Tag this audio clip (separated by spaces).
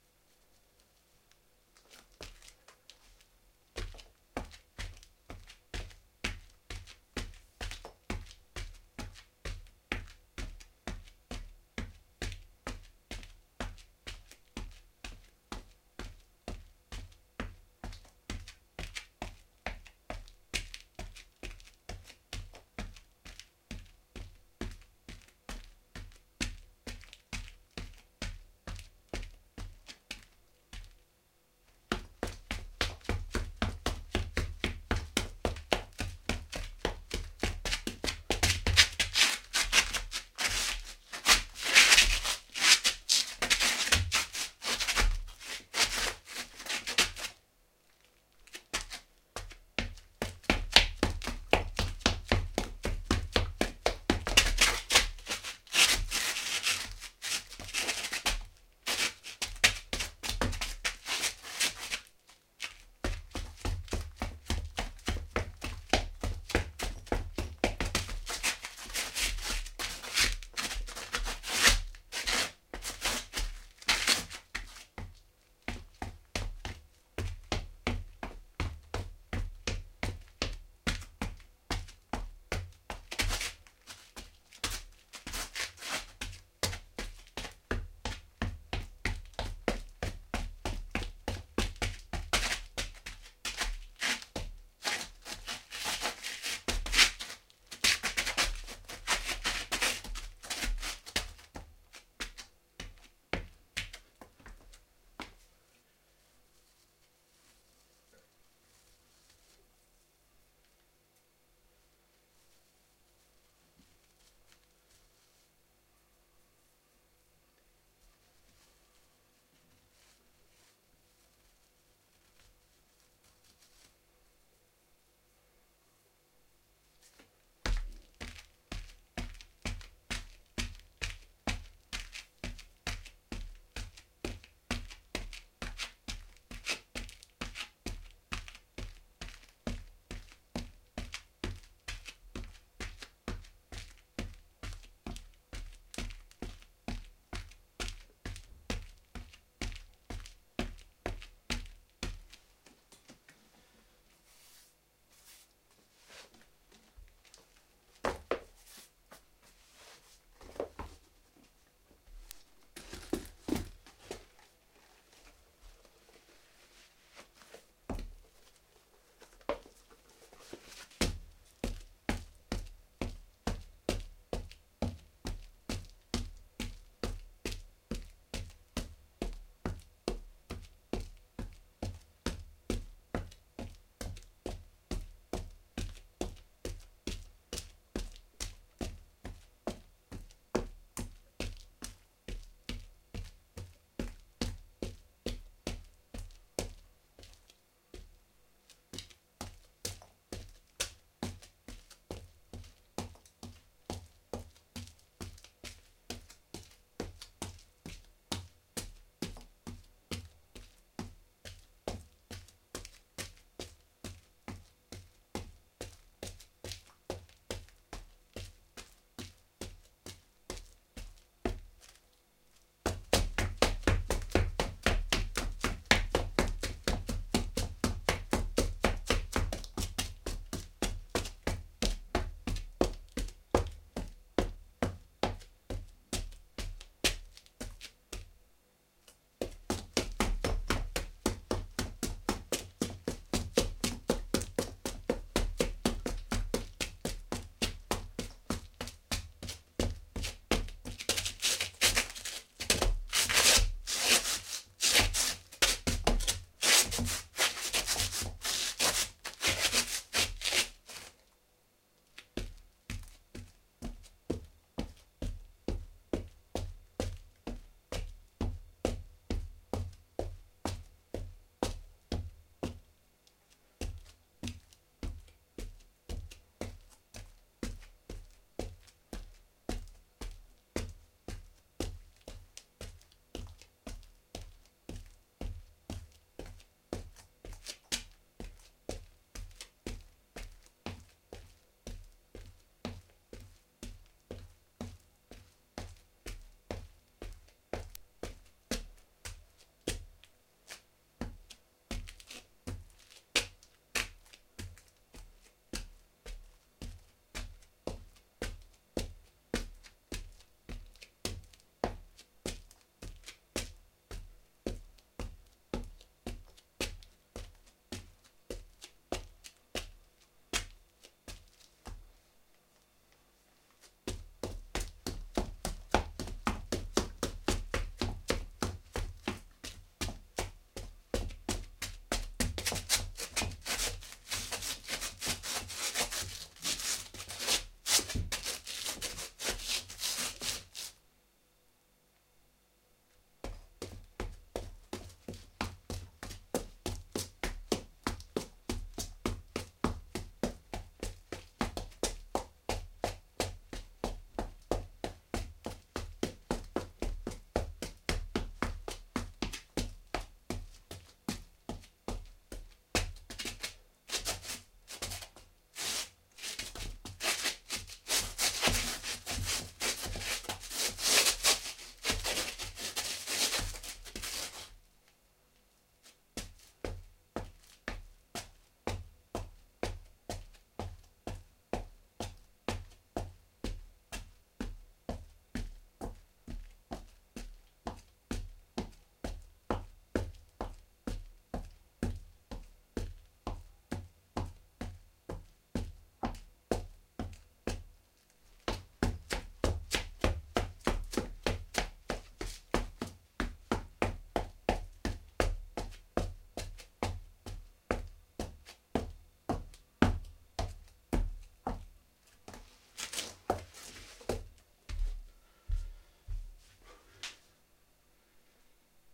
feet foley foot footstep footsteps outside run running shoe shoes shuffle step steps street walk walking